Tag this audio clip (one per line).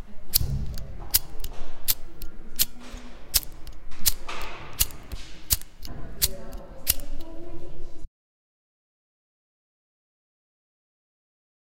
Library
Sound
Open
OWI
Window